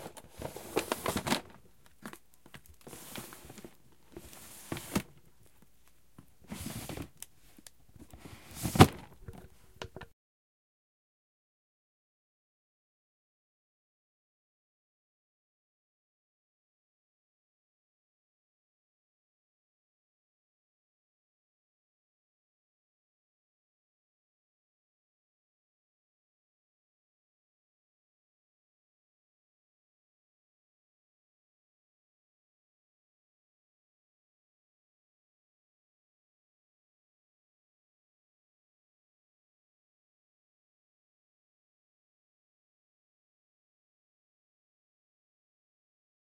recorded with a zoom mic
drawers in and out
home, indoors, household